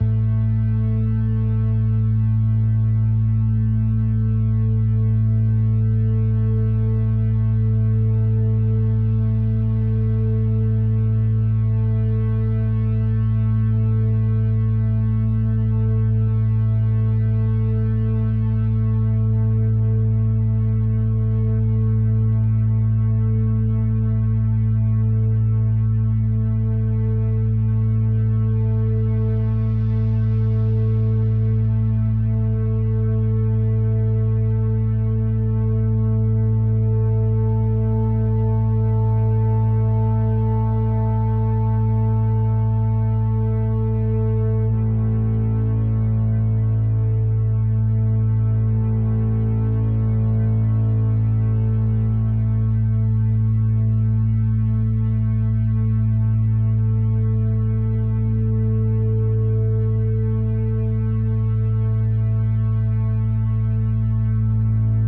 i made this drone the other day, sharing it with the sound heads fam. stay awesome
ambient, atmosphere, dark, drone, eerie, electro, noise, sci-fi